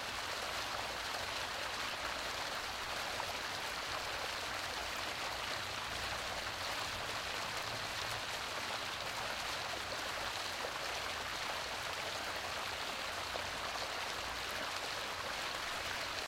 water pool stream
working on some water sound fx
fall, pool, stream, water